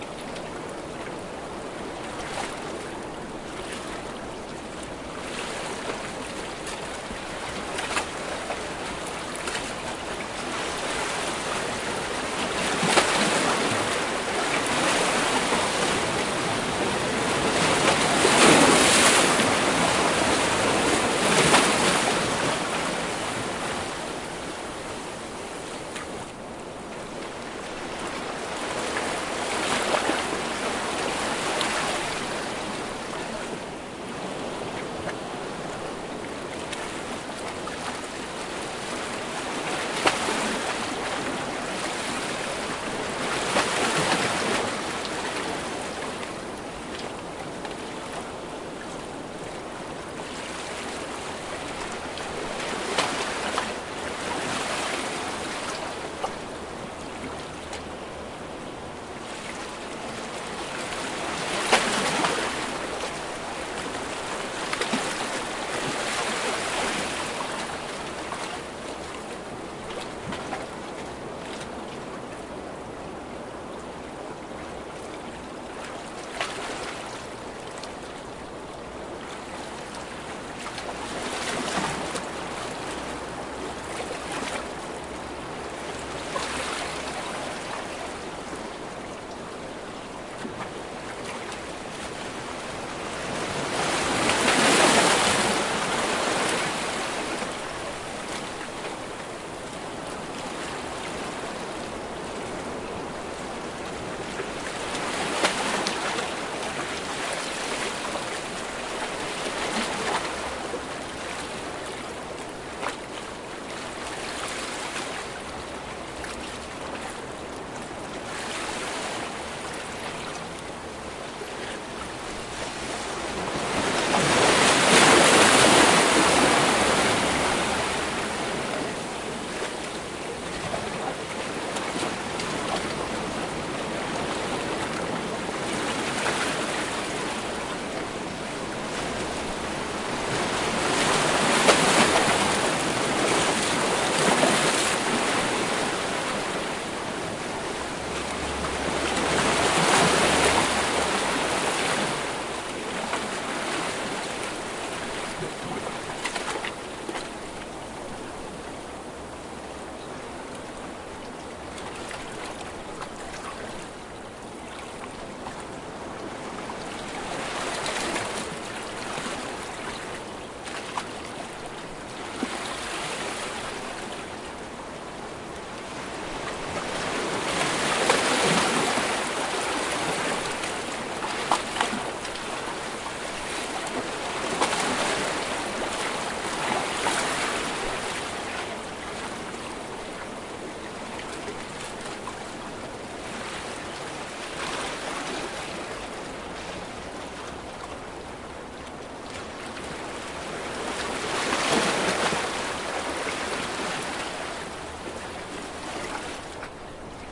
Olas de mar pasando por entre las piedras, tomado con el micrófono desde arriba.
Sea waves passing through the stones, recorded from above.